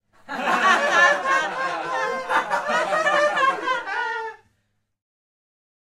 Group of people laughing in a room and having fun
crowd, fun, laugh, laughing, laughs, laughter, radio-effects, smile